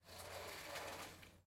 sliding door open 2, quad
Quad recording of sliding glass door opening. Left, right, Left surround, right surround channels. Recorded with Zoom H2n.
door, l, ls, opening, quad, sliding